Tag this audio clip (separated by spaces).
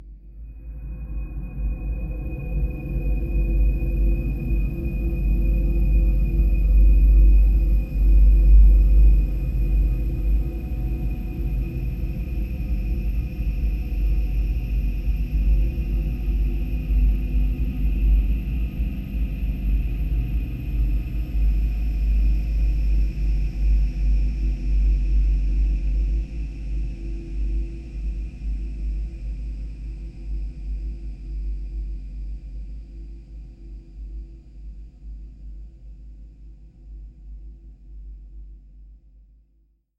cinimatic cloudy multisample pad soundscape space